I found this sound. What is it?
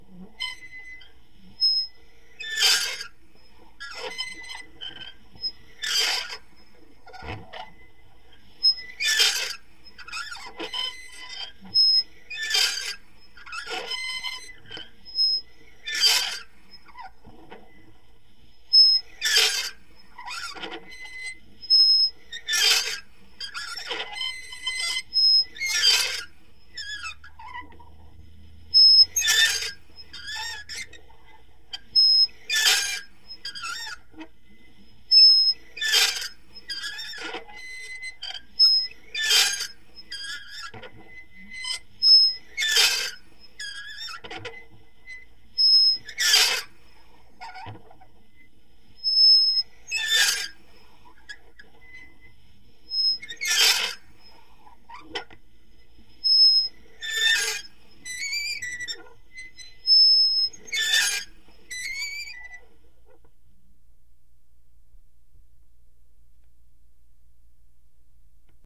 Meat Grinder05M
I recorded these sounds made with a toy meat grinder to simulate a windmill sound in an experimental film I worked on called Thin Ice.Here are some slow squeaks with a toy meat grinder.
squeaking, recorded, 416, dat, metal, mono, using, toy